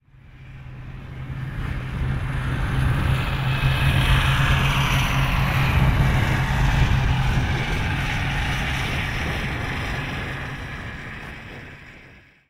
snowmobiles pull away far